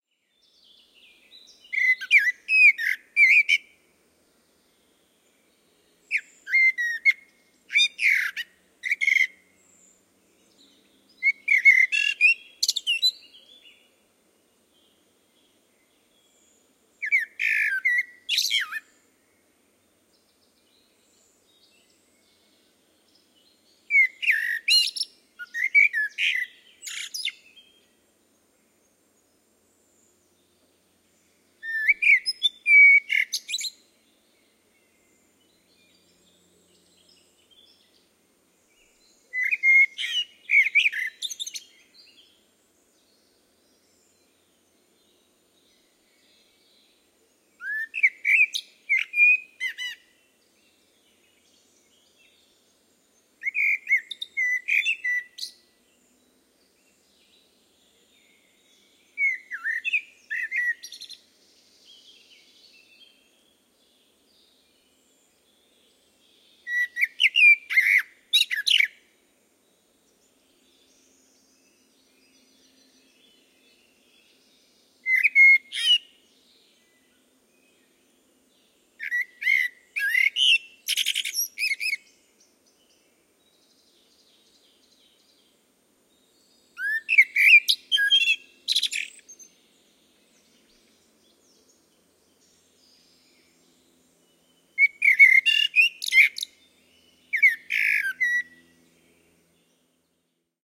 blackbird in blackforest
Blackbird singing at a distance of 8 meters at dusk in a forest near Baiersbronn, Black Forest, southern Germany. Very clean recording nearly without any background noise. Vivanco EM35 on parabolic dish with preamp into Marantz PMD 671.
schwarzwald, forest, merle-noire, birdsong, turdus-merula, bird, blackbird, spring